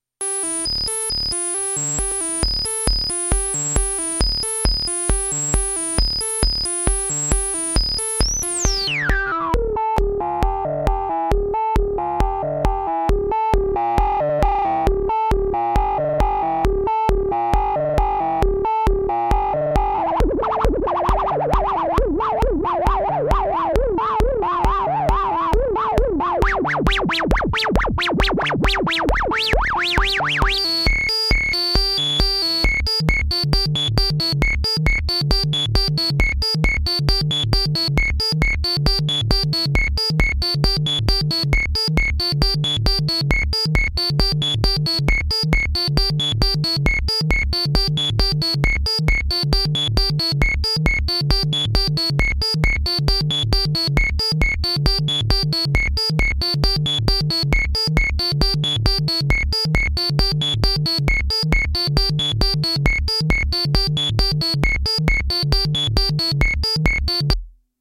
Little S&H groovebox fragment 01
A little fragment using the Korg Monotribe.
Recorded with a Zoom H-5.